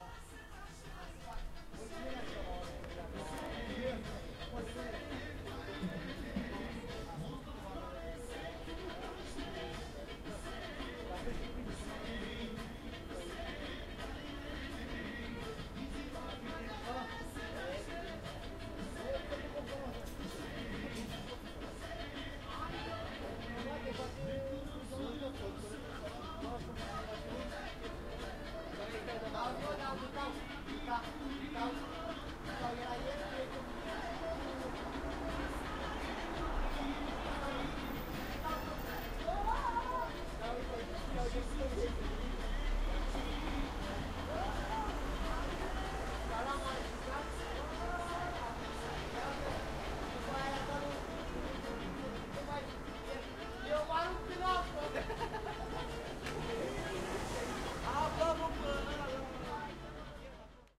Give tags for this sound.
gypsies ambience city valoare gratar bustling manele people

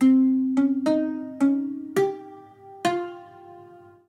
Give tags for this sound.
monophonic phrase santur

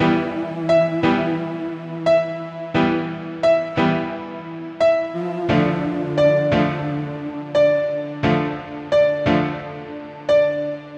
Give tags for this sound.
atmosphere grand loop piano

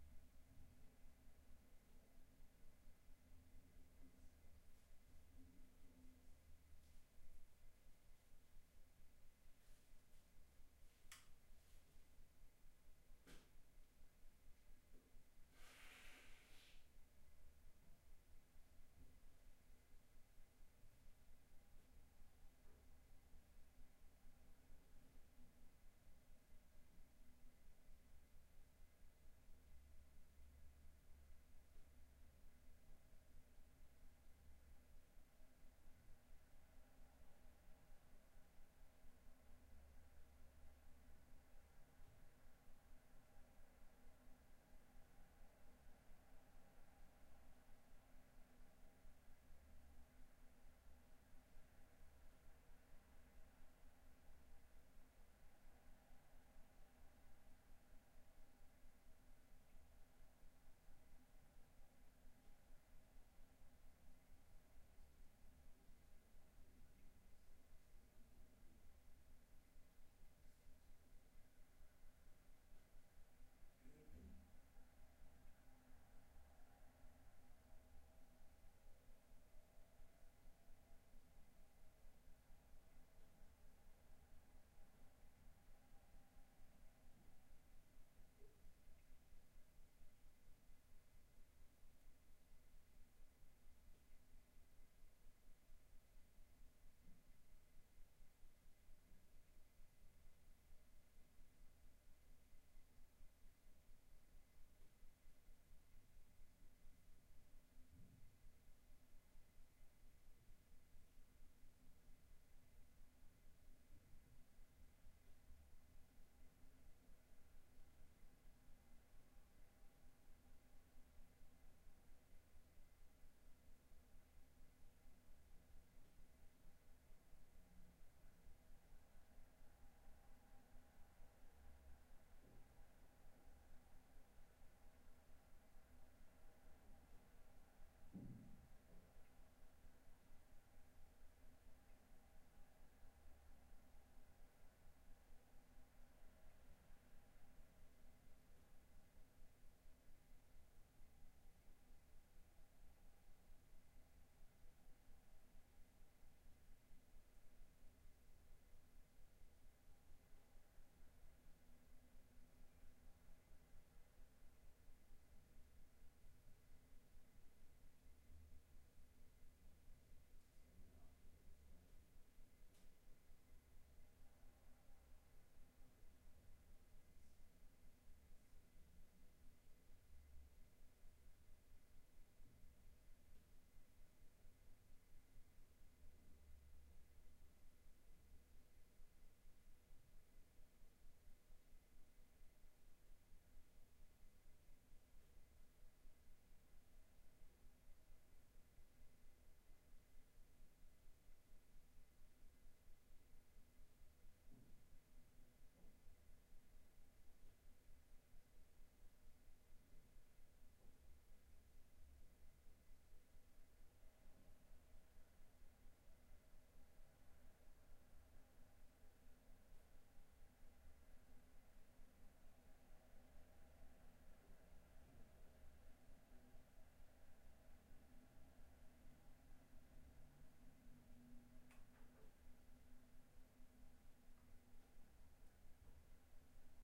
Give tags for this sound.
atmo atmos atmosphere background noise radiator room stereo

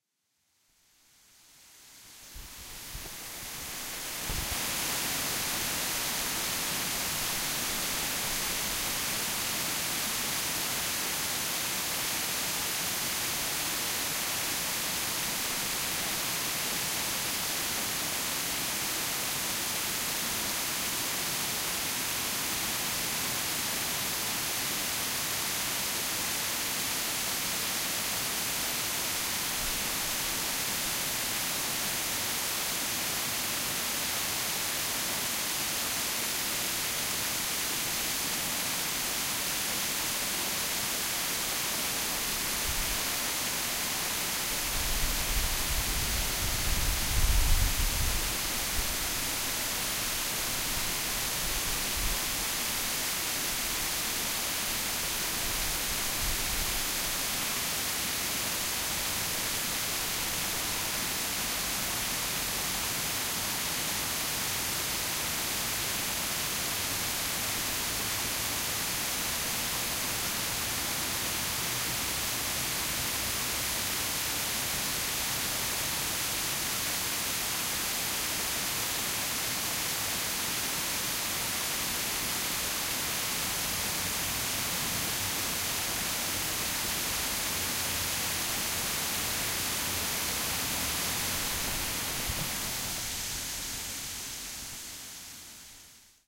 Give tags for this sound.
background waterfall cityscape park fountain city-park japan ambience tokyo field-recording

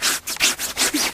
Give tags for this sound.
Essen Germany

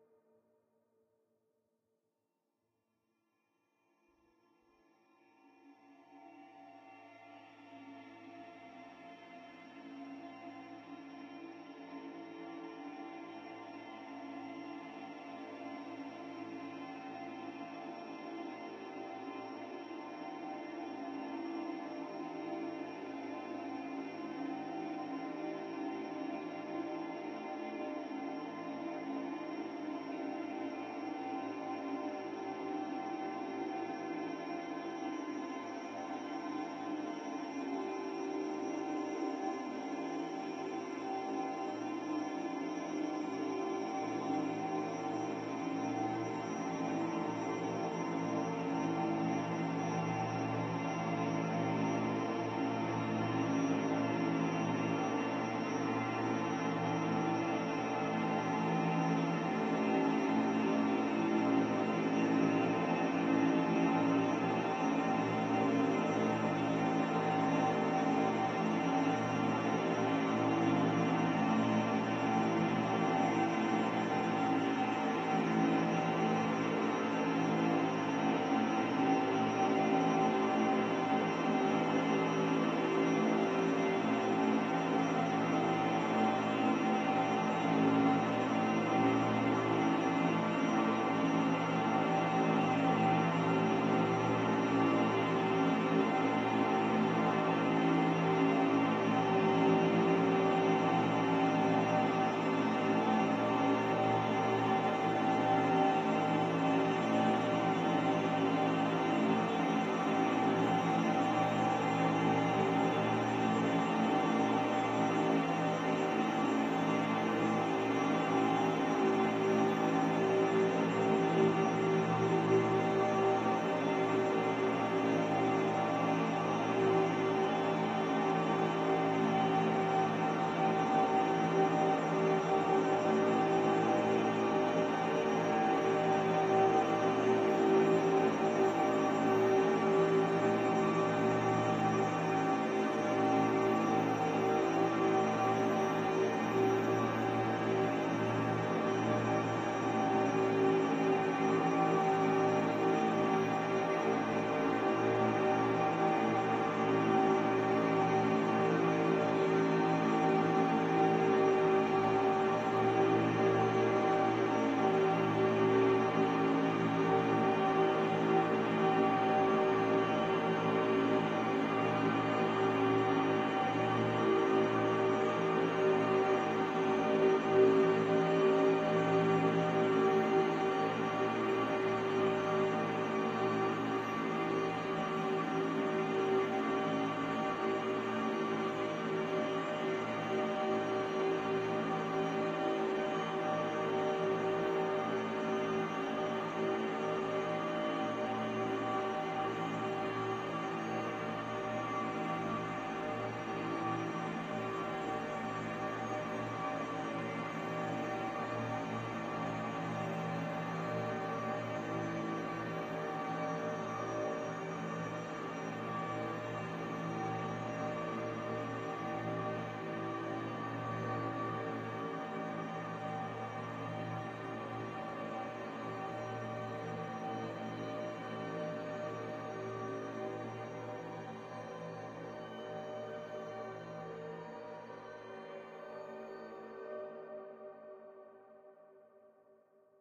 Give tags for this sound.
divine dream drone evolving experimental multisample pad soundscape sweet

